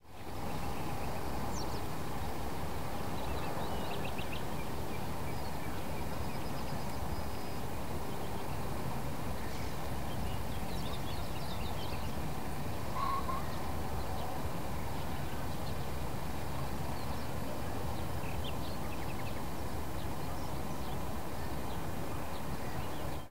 atmosphere-sunny-birds
Ambience of field of grass far form road. High noon, sunny day. Sounds of birds and hum of road far away. Recorded on Zoom H4n using RØDE NTG2 Microphone. No post processing.